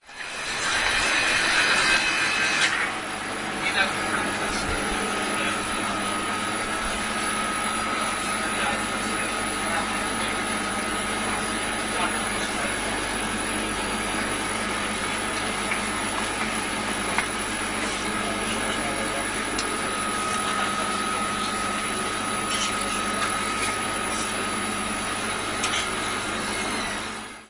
zabkowelodowki200809ogrodowa
20.08.09: Ogrodowa/Piekary streets corner in Poznan. Zabka shop: refrigerators are swooshing.
refrigerator, swoosh